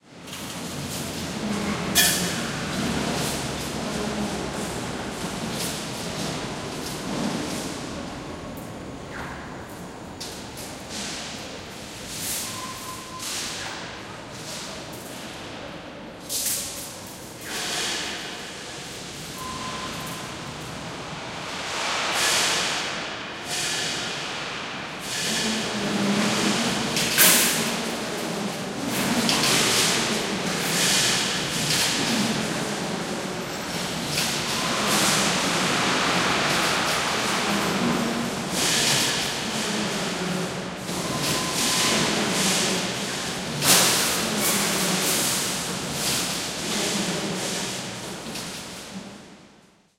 steel factory010
Unprocessed stereo recording in a steel factory.
industrial, noise